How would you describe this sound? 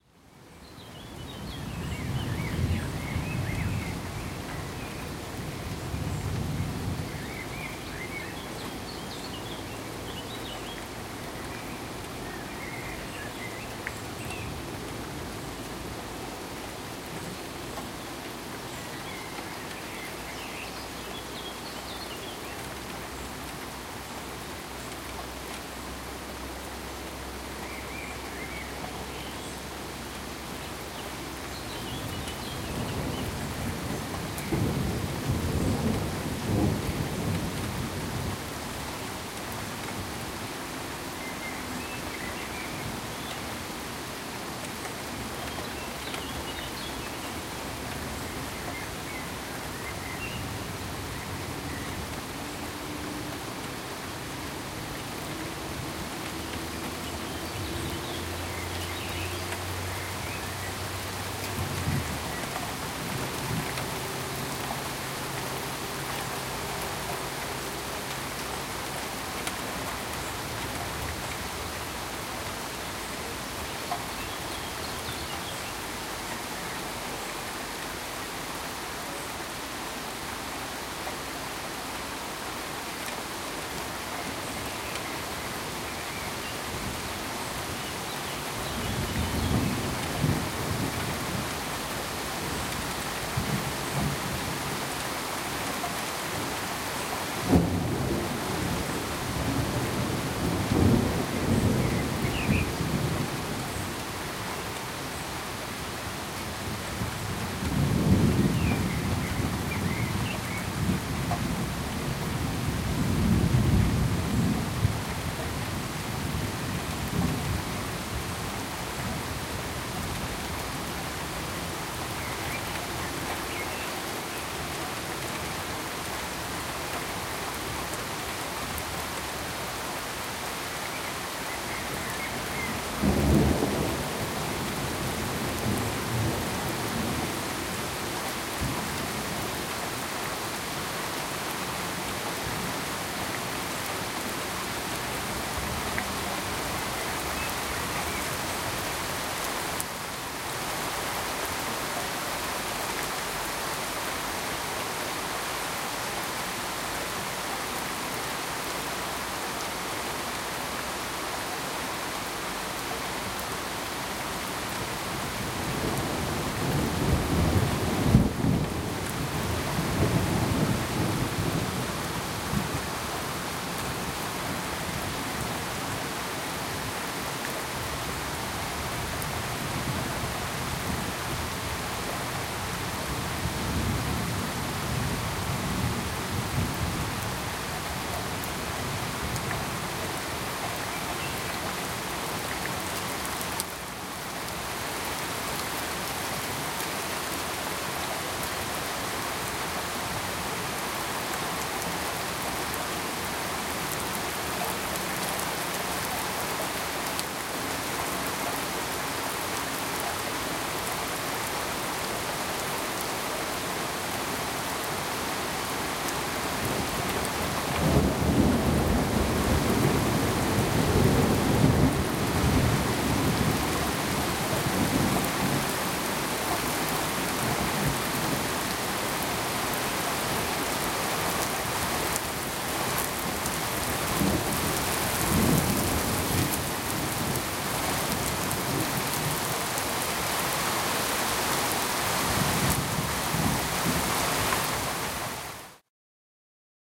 Heavy steady Rain with gently rolling thunder in background.
Please check out also my other recordings of thunderstorms and rain: